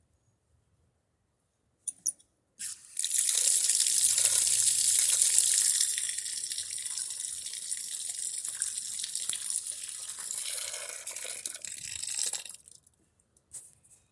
Hose water flowing. This sound could be used for manything from the sound of a garden hose to a stream.
field-recording, hose, ripple, splash, stream, water